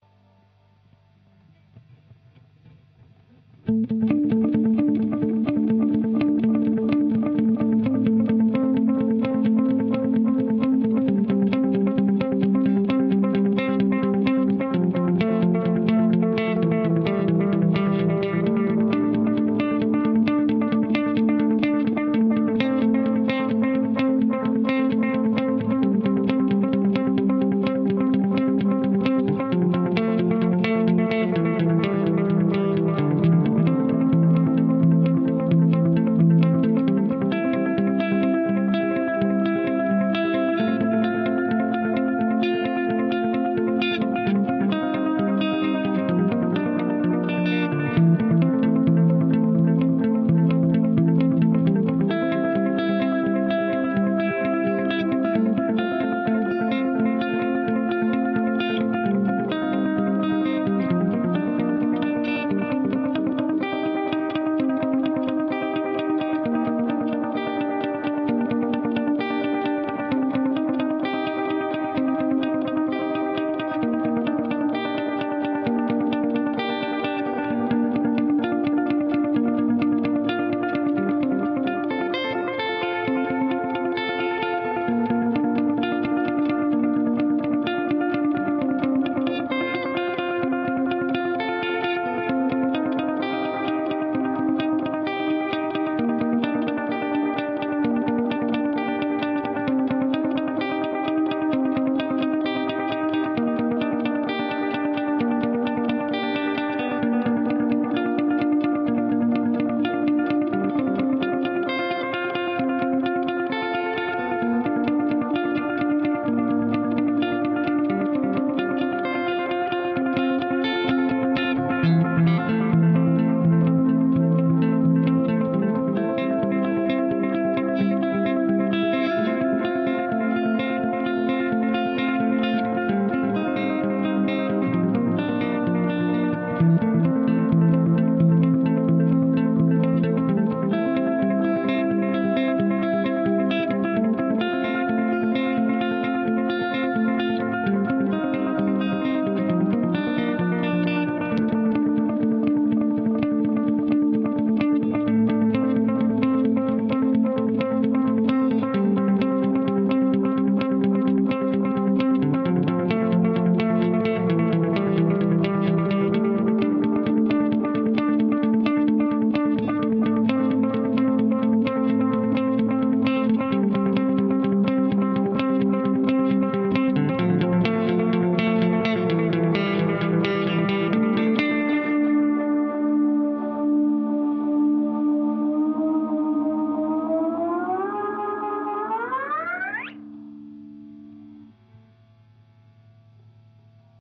Robot Heart
This is quick, melodic, electric guitar composition, whith using daley pedal effect.
130 bmt.